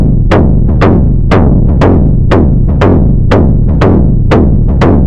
make with reaktor block: west coast modules